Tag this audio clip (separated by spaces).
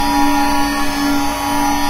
future hover futuristic machine spaceship Strange loop engine motor energy